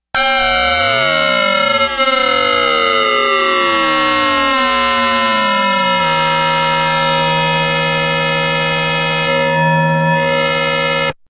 sound created for science fiction film. created with a minimoog.